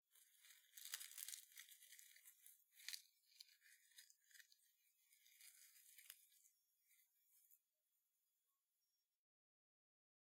Foley Leaves dry crackle A-001
Dry leaves being crumbled up.
Leaves, Crackle, Break, Foley, Crumble